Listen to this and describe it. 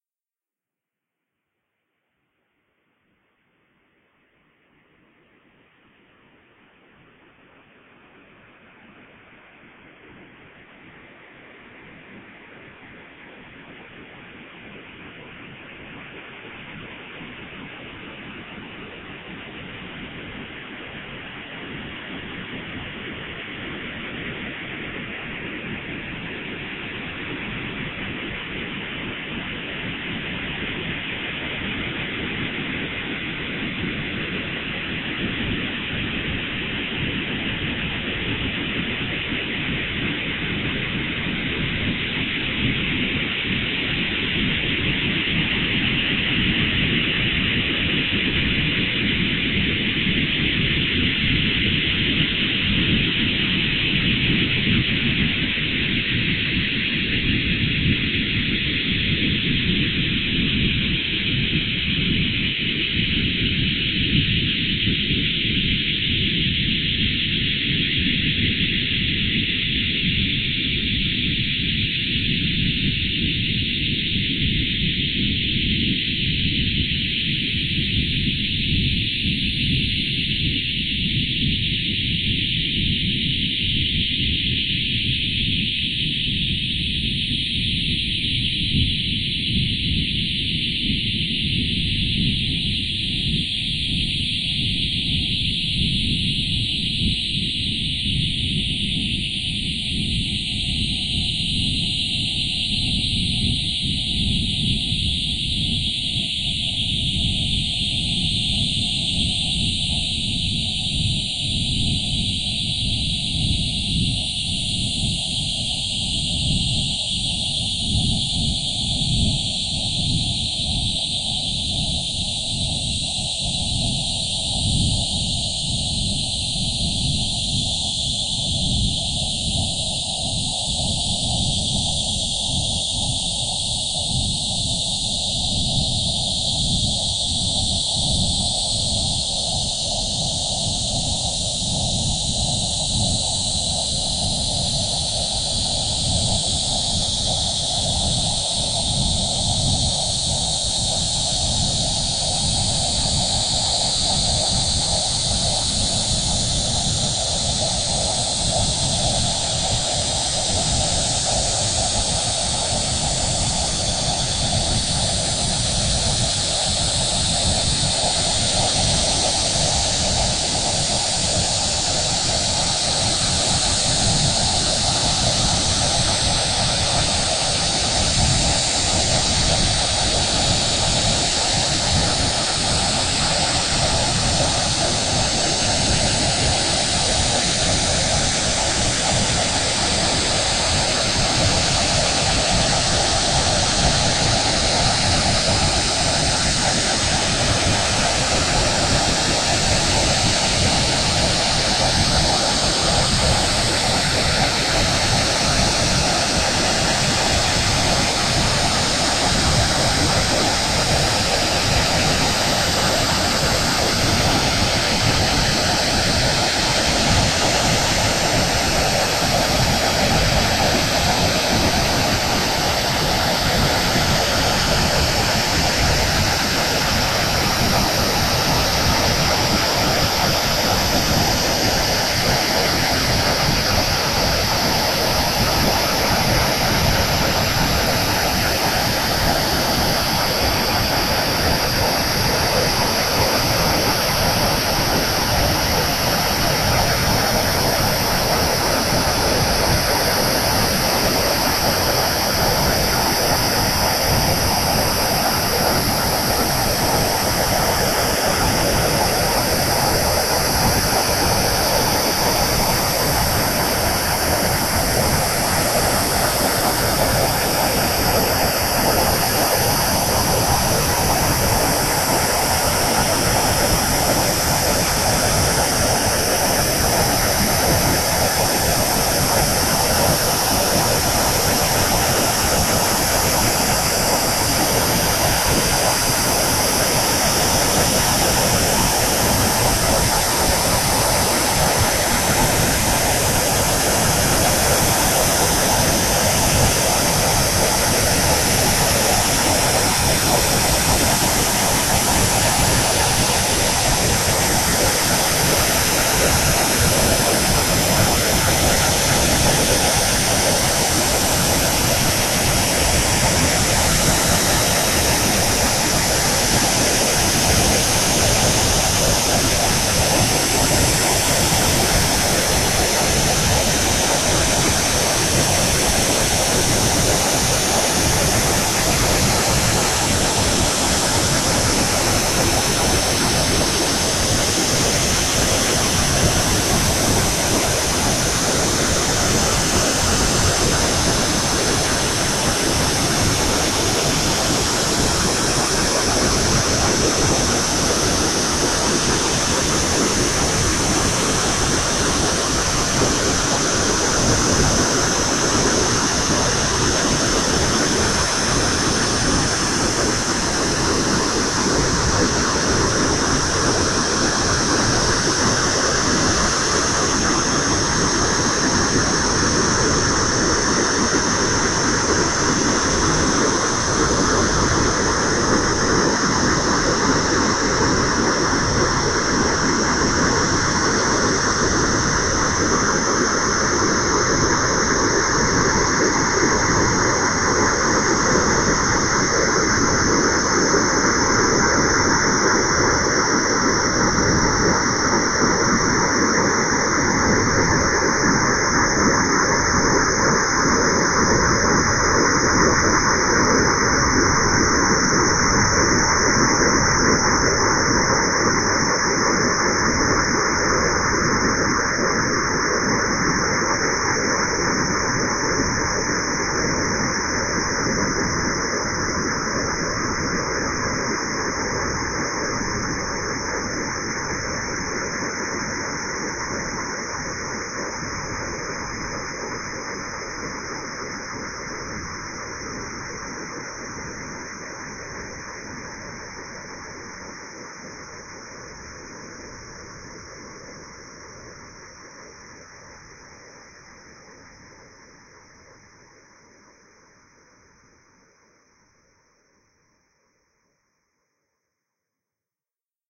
Day 14 14th July copyc4t Airy Ambience
ambiance, ambience, ambient